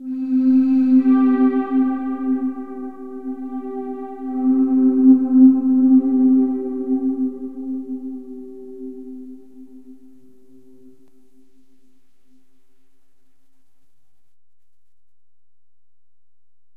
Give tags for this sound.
soothing; sound

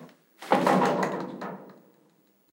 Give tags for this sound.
big; FX; jumping

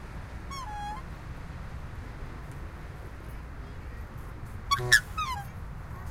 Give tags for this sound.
tropical; exotic; waterbirds; birds; field-recording; zoo

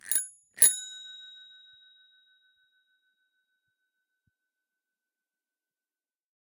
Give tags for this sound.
bell; bike; ring

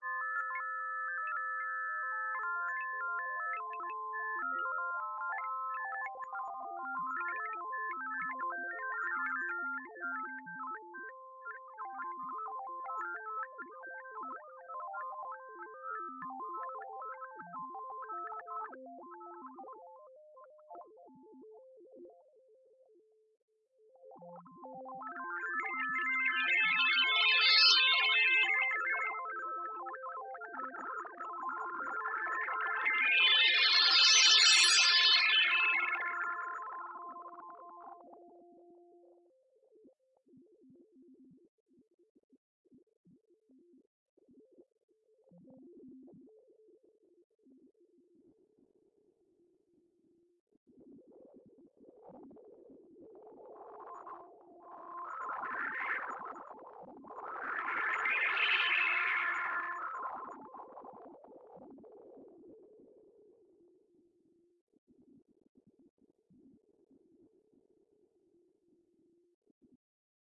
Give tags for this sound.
abstract; sci-fi; noise; experimental; weird; modem; number; effect; phones

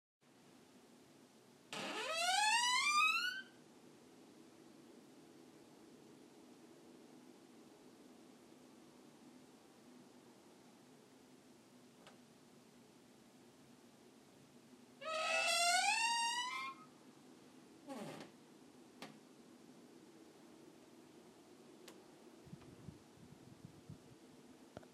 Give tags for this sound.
close; wooden-door-opening; creak; wooden; door; wood; open; squeaky; wooden-door-closing; creaky; squeak

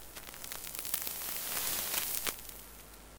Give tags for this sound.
electricity; Sparks; hiss; static; noise; faulty; cable; sparking; buzz; fault

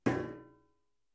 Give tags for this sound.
hit; metal

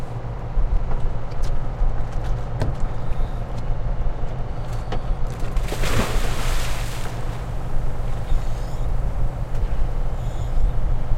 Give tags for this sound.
bucear; splash

